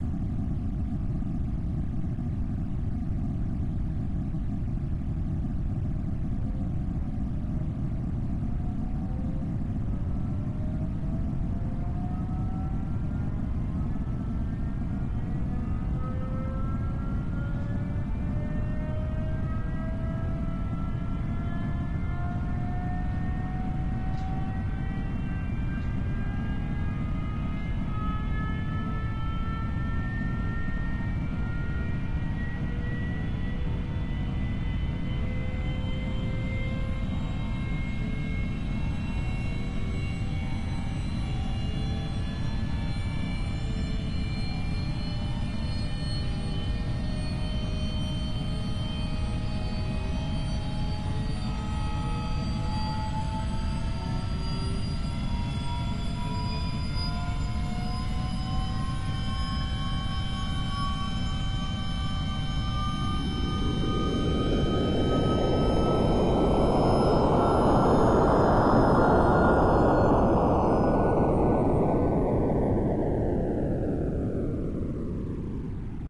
The sound of an Airmoth 948 starting up. It's part of Project Airmoth, an attempt to create the sound of a fictional aircraft, the Airmoth series. Starts with inertia starter, ,engines start and in a bit, it takes off. Made with generated (LabChirp) and real sounds.